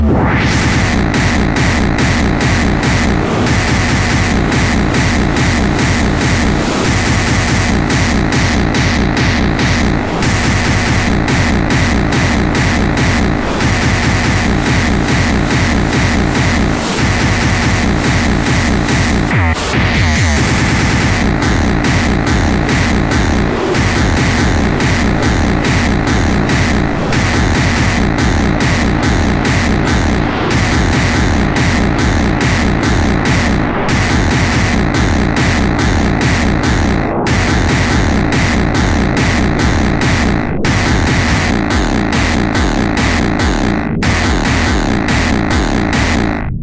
Rhythmic Noise 5, with Hardcore Gabber Kick.